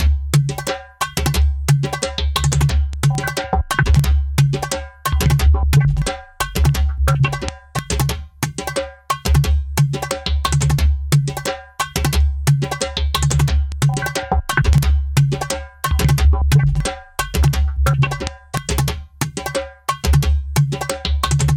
warped djembe mgreel
Formatted for the Make Noise Morphagene.
This reel consists of a spliced drum loop. The final splice is the whole loop without any splices.
Djembe samples, recorded by me, processed with filters and modulated delays.